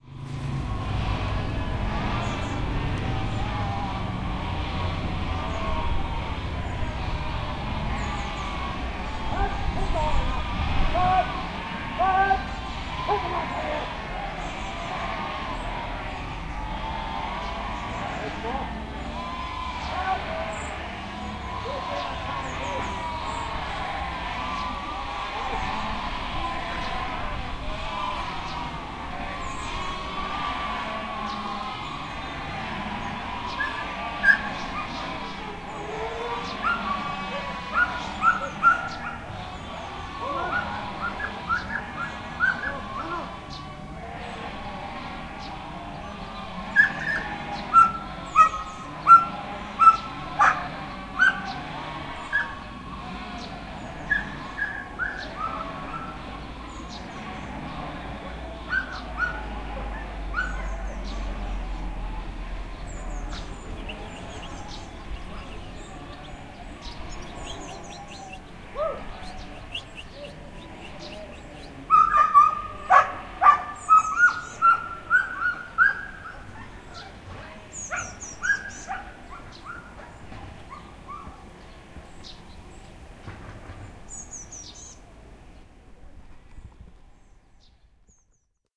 Flock of sheep being moved
A stereo field-recording of a small flock of Welsh Mountain sheep being moved from one field to another along a lane. A Border Collie sheepdog is told off by the farmer while another tethered dog goes mental at being left out of the action. Zoom H2 front on-board mics.
barking, birds, collie, dog, dogs, farmer, field-recording, lambs, moving, sheep, sheepdog, shepherd, shouting, stereo, welsh-mountain-sheep, whistling, xy